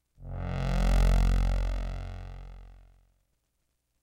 Sound made with the Arturia Minibrute.
analog, minibrute, synth, synthesizer, synthetic